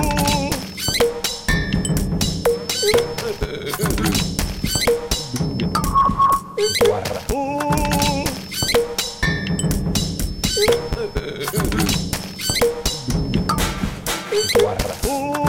Human funk 1
The sounds in this loop are not edited, only volume and/or length, so you hear the raw sounds. I cannot credit all the people who made the sounds because there are just to much sounds used. 124BPM enjoy ;)
groove, loop, strange, weird